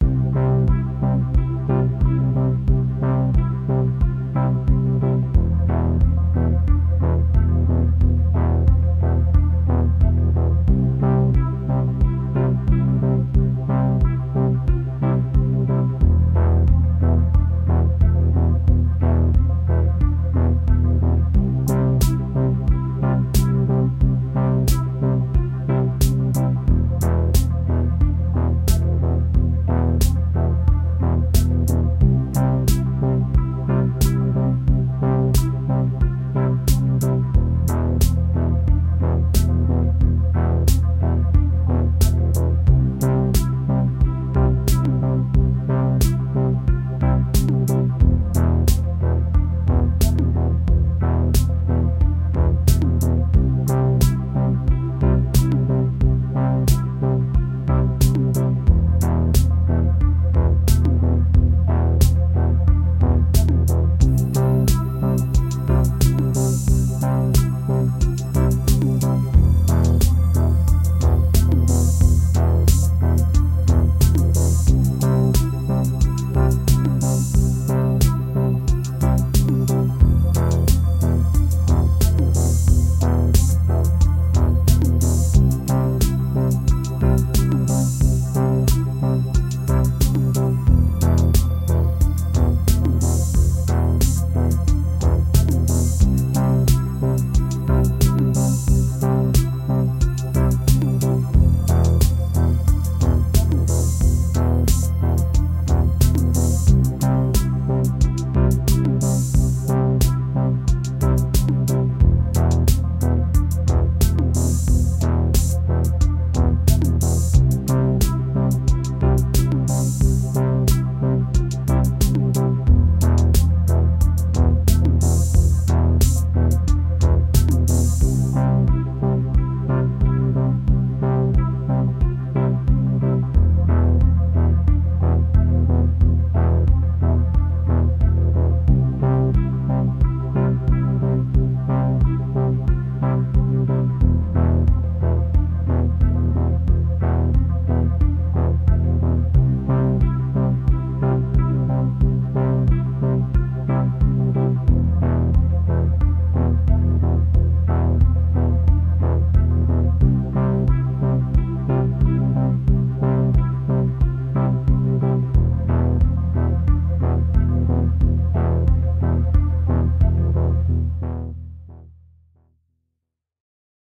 calm-exit-90bpm
Moderate tempo (90 bpm) techno-synth melody in the key of C. Good for an outro.
bio, biology, C, calm, digital, key, moderate, science, scientific, simple, synth, techno